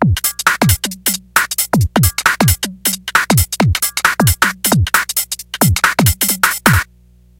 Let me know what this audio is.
2Step 134 bpm
created on my emx-1
emx-1, funky